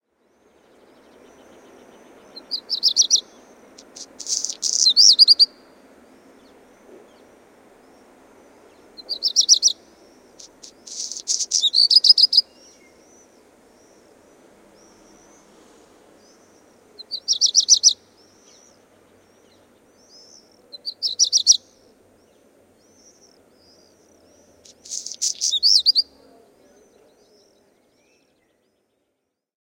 1165black redstart
Black redstart [Phoenicurus ochruros] singing on top of our roof at the end of June. The song is composed of one melodious part and a very strange bird-unlike sound, which reminds of pebbles being rubbed together. Calls of swifts, city rumble and street noise in the background. Vivanco EM35 on parabolic dish with preamp into Marantz PMD 671.
phoenicurus-ochruros, zwarte-roodstaart, mustaleppalintu, rougequeue-noir, birdsong, black-redstart, hausrotschwanz, colirrojo-tizon, rabirruivo-preto, svartrodstjert, cotxa-fumada, husrodstjert, bird, codirosso-spazzacamino